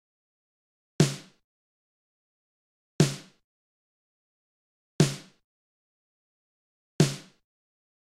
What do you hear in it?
Stone Beat (120) Snare
Stone Beat (120) - Snare drum part isolated.
A common 120 bpm beat with a slow stoner feeling, but also a downtempo trip-hope texture.
svayam